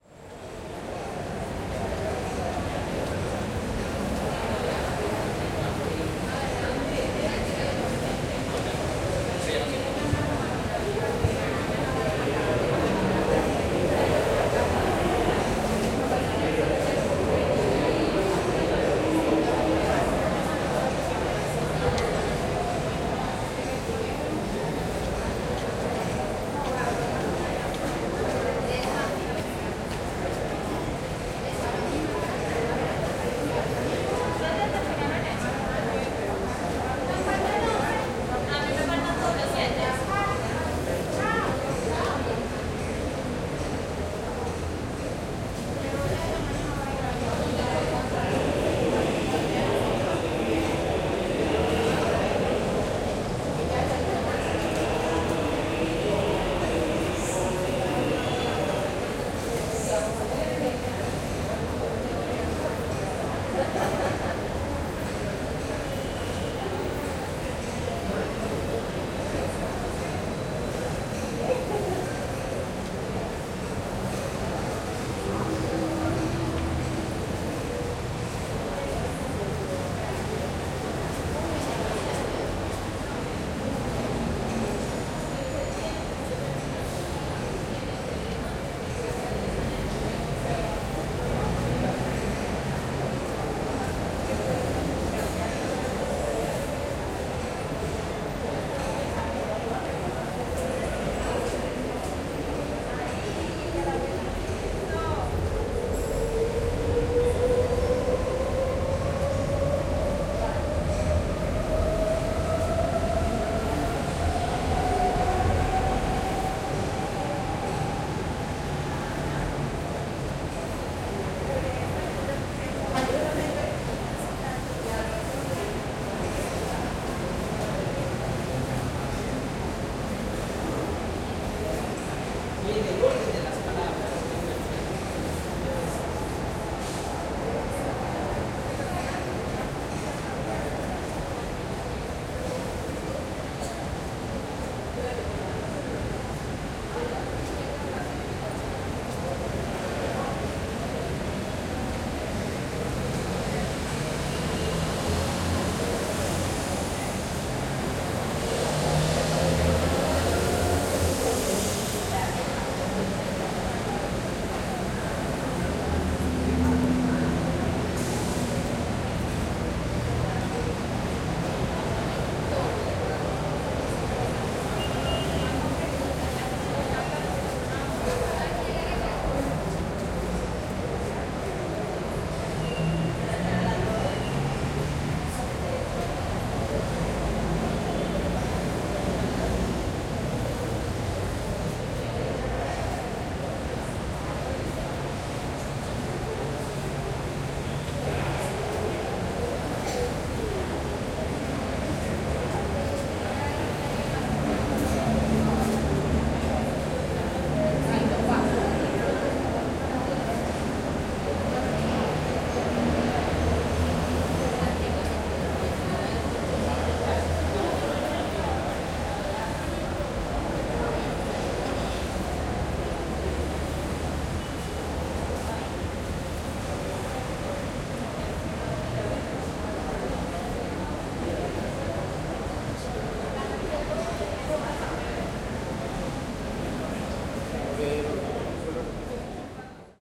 Medellin Metro Outside Walla Quad
Walla from outside of a Medellin's metro station Quad. Recorded with Zoom H3-VR.
Ambience, Crowd, Metro-Outside, Subway, Waiting-Subway, Walla